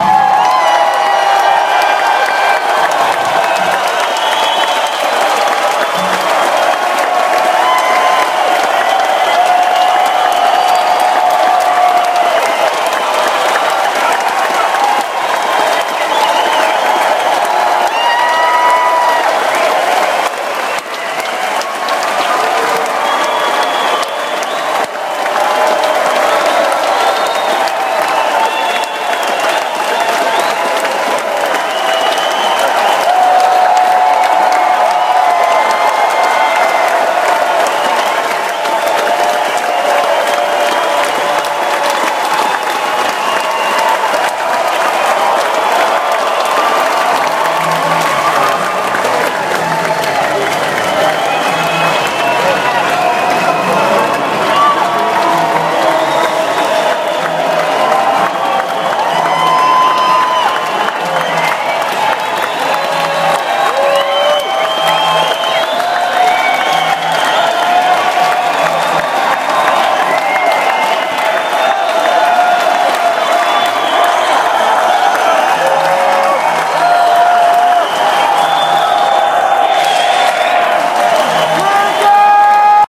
Large crowd applause
A loud cheering crowd such as you would hear at a rock concert. There is no music on this clip, just cheering and crowd sounds